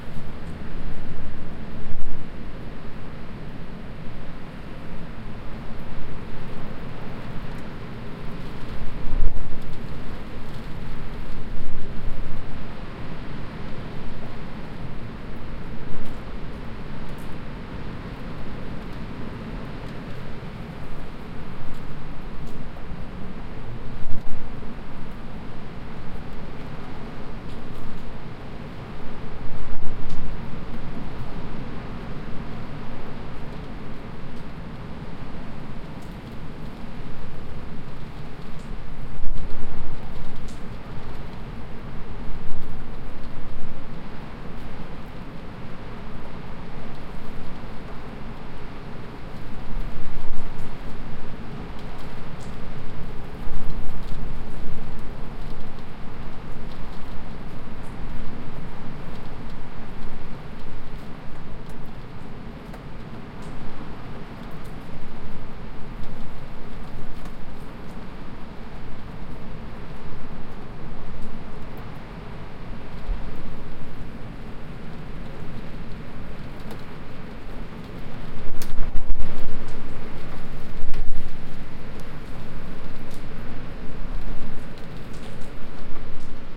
porto 19-05-14 quiet to moderate waves on rock beach 5m from surf break
Quiet day, close recording of the breaking waves.
sea-side, binaural, wave, wind, field-recording, sea, tide, atlantic, surf